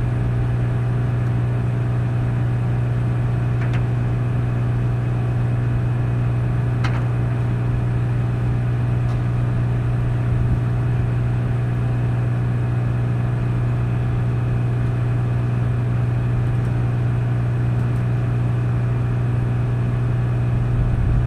Hum Phone Pressed Against Metal Door
Mechanical hum recorded inside an industrial garage in Brooklyn, recorded with iPhone pressed against the door.
ambience, ambient, background-noise, buzz, field-recording, general-noise, hum, machine, mechanical, power-tools